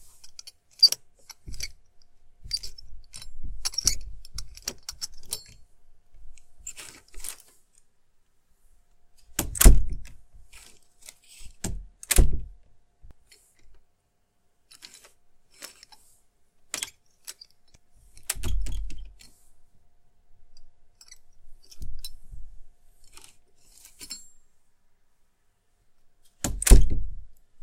Metallic doorknob and door shutting
Various sounds of door fumbling and slams.
wood, slam, closing, slamming, creak, opening, open